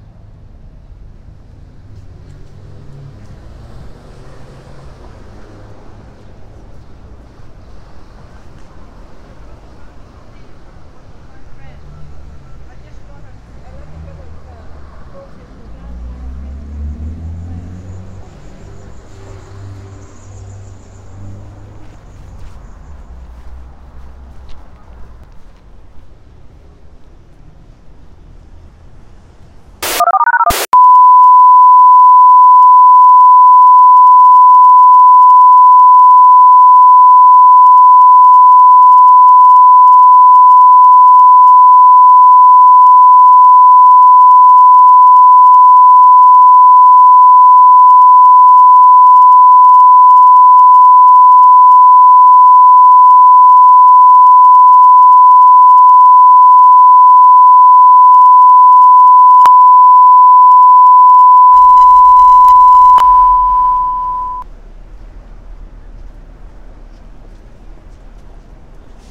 A simulation of the ATI System HPSS-16 Siren seen across the city of Nashville, TN with simulated scanner plus activation DTMF.